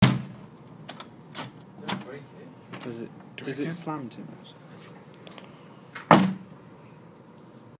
Its a window closing from open position, this is designed to mask a car door closing.
closing, glas, glass, wind, window